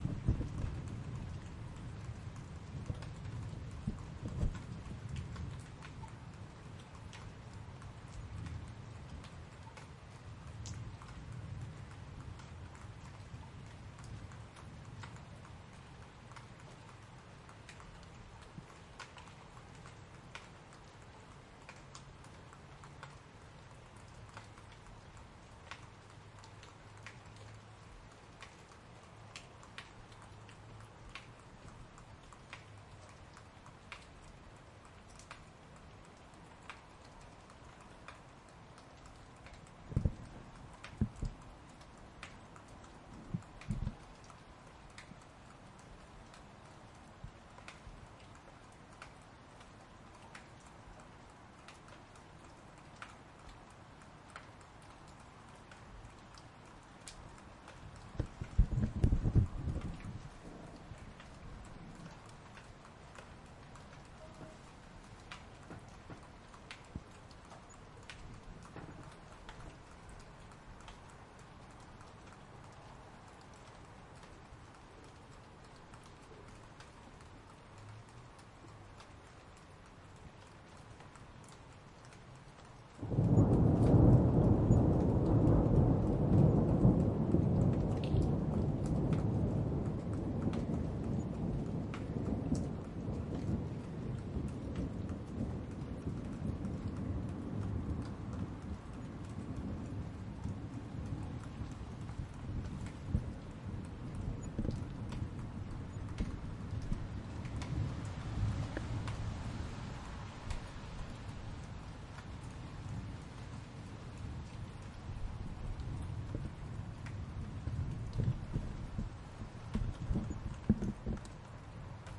Rain and dripping water distant thunder towards end recorded in Plymouth Devon July 2017